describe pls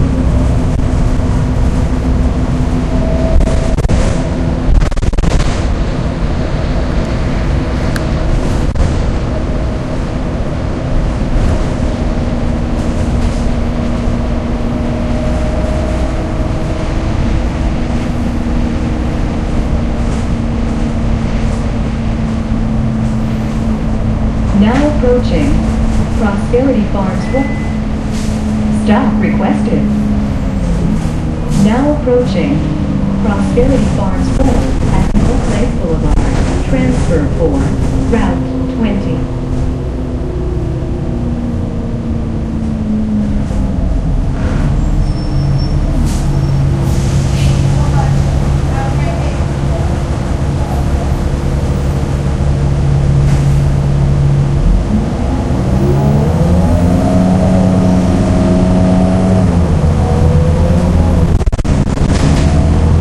One of a series of recordings made on a bus in florida. Various settings of high and lowpass filter, mic position, and gain setting on my Olympus DS-40. Converted, edited, with Wavosaur. Some files were clipped and repaired with relife VST. Some were not.